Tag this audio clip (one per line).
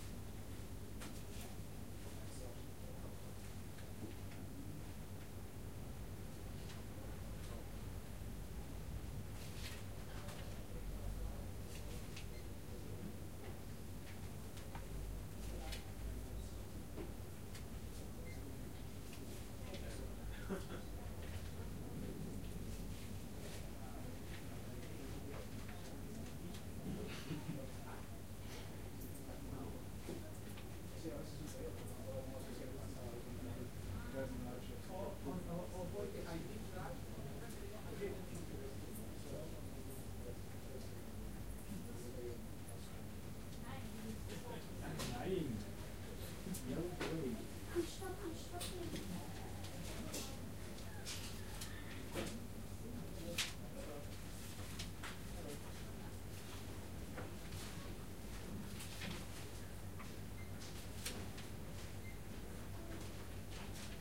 Ambiance
Ambience
Bookshop
Interior
Quiet
Shop
Store